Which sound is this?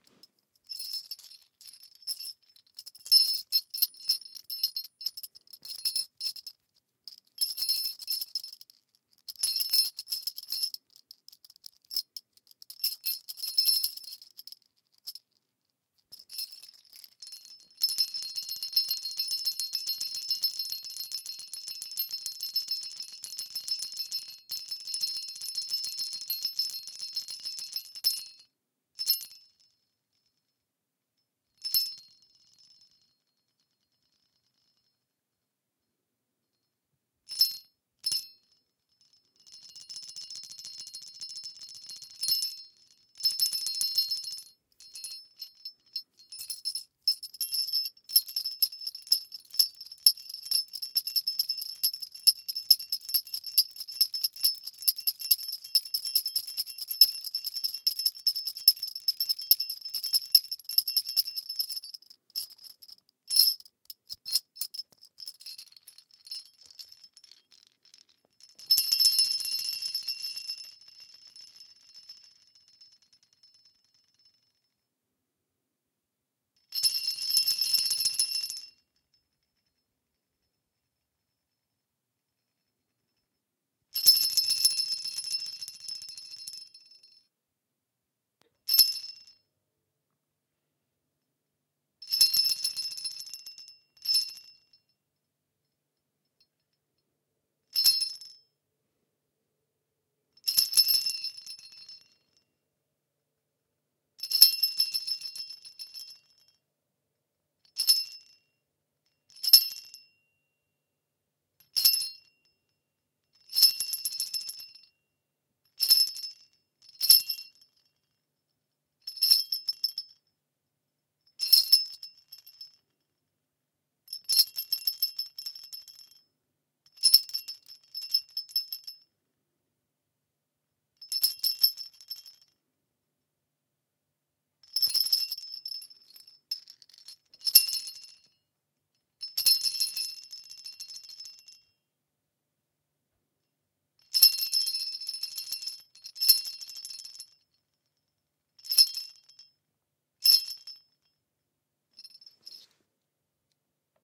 small indian jingle bells
Recording of small Indian, possibly Buddhist related double jingle bells. Various sounds from rhythmical to single hits.
Originally recorded to be used as a door jingle in a movie scene.
beat, bells, buddhist, hit, indian, jingle, rhythm, small